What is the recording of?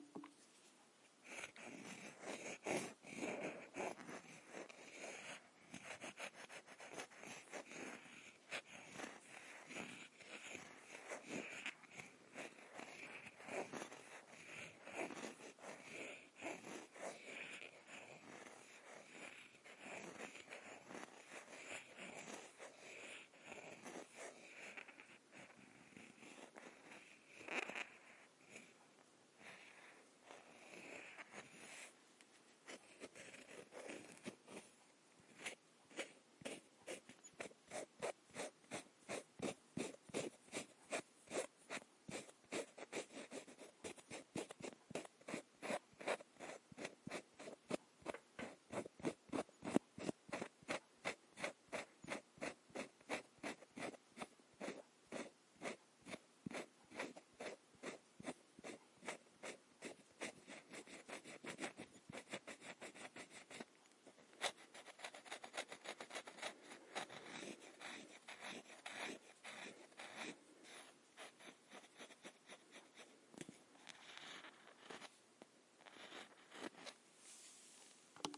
Sheathed Pen On Skin
Sound of the tip of a retracted pen being scraped and run over my hand. There is the odd squeak of the plastic against the skin. Some minor processing has been applied to cut the really low frequency sounds (sub 200hz).
rubbing, squeak